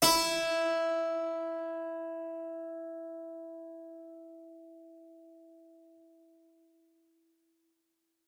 Harpsichord recorded with overhead mics
Harpsichord, instrument, stereo